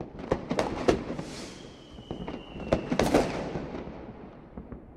delphis FIREWORKS LOOP 17 MO
Fireworks recording at Delphi's home. Inside the house by open window under the balcony Recording with AKG C3000B into Steinberg Cubase 4.1 (mono) using the vst3 plugins Gate, Compressor and Limiter. Loop made with Steinberg WaveLab 6.1 no special plugins where used.
explosion, fire, thunder, shot, akg, c4, fireworks, delphis, ambient, c3000b